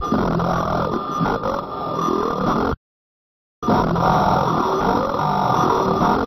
Processing a radio interference of two sentences